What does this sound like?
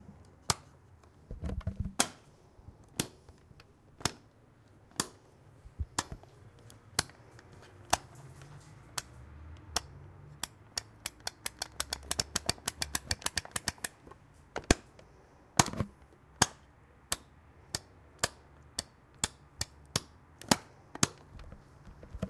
We will use this sounds to create a sound postcard.
spain, sonicsnaps, sonsdebarcelona, doctor-puigvert, barcelona